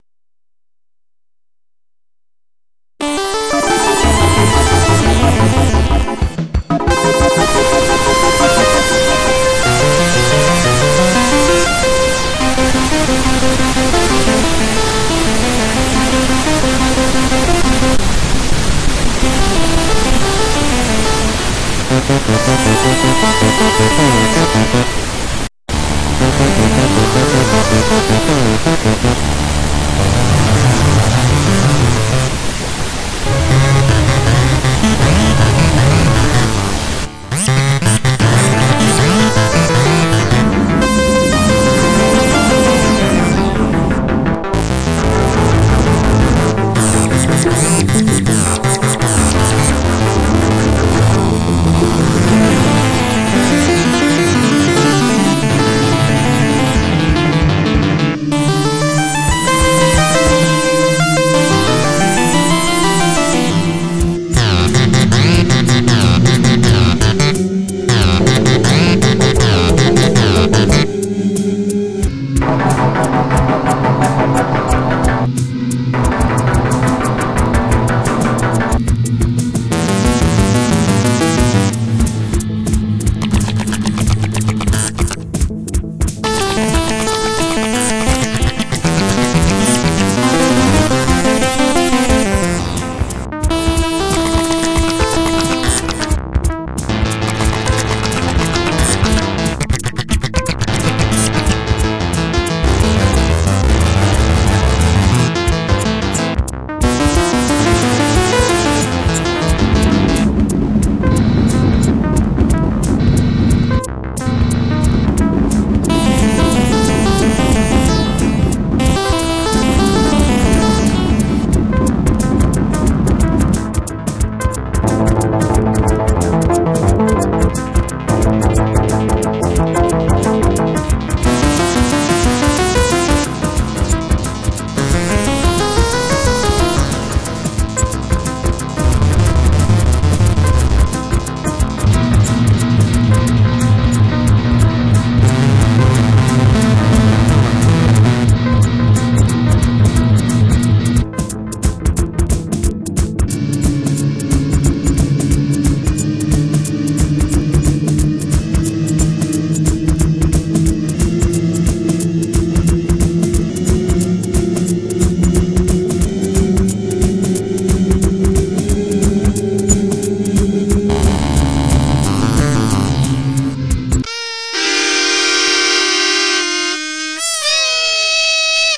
Sequences loops and melodic elements made with image synth.
sound, loop, sequence